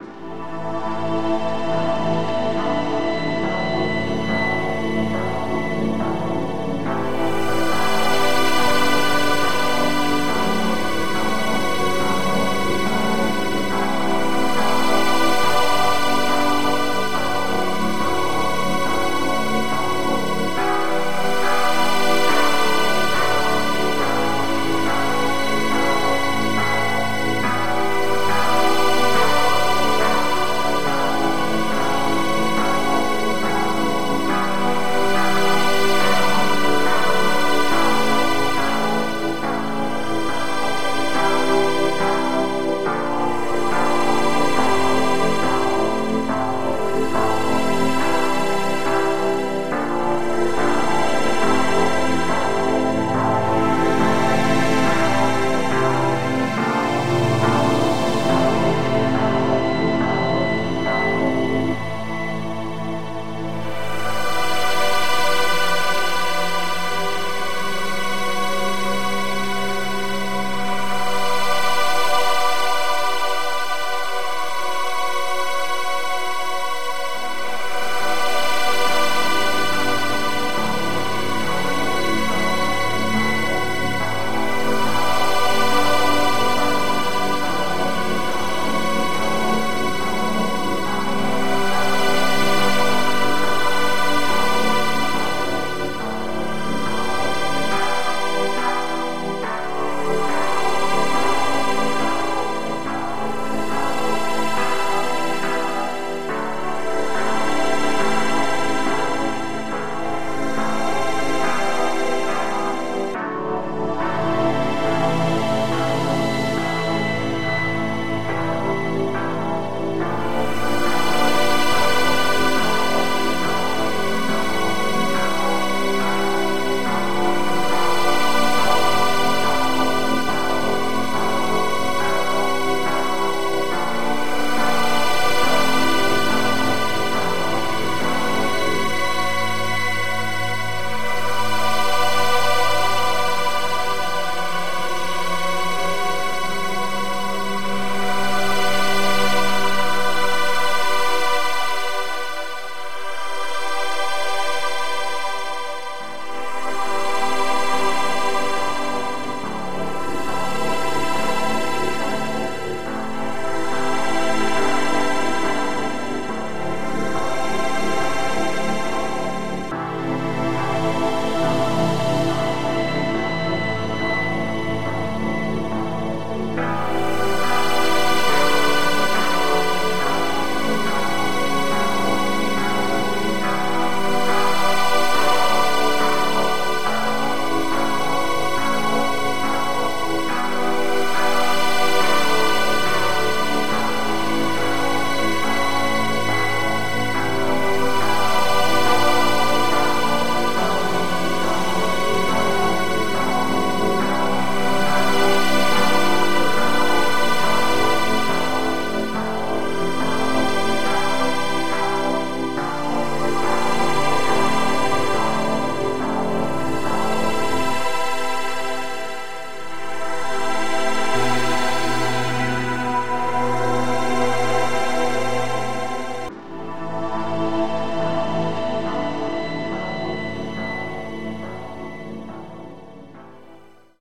Keys and pads, made on Music Maker virtual synthesizer with midi files. Pads repeat three times with a different harmony of keys and pads each time. A touch of disharmony for tension in C major with e and a minor.